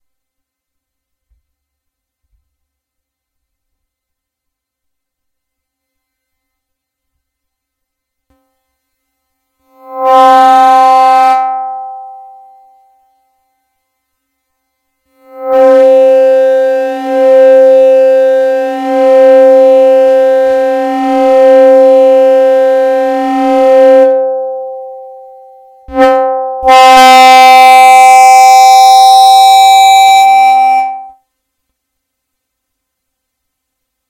getting feedback from something, not sure what.